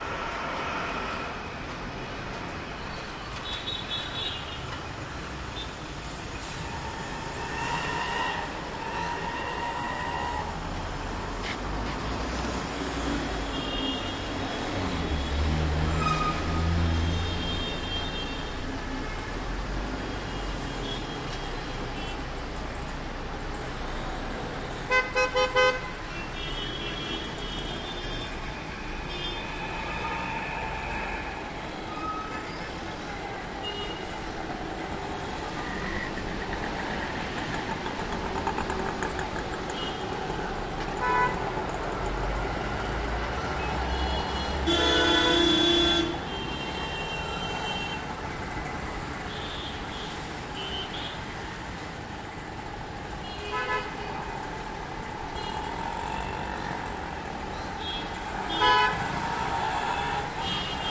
sound-sterio-trafic